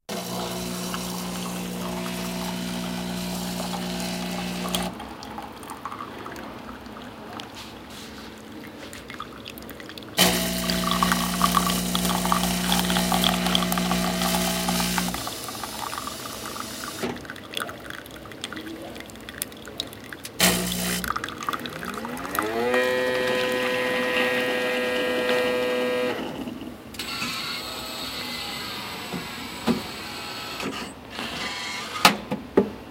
An automatic pod-style coffee maker. The machine sounds are loud and jarring. You can also hear some coffee pouring into a cup, the water filling and the machine spewing out the coffee pod.
Recorded on a Samsung Galaxy S3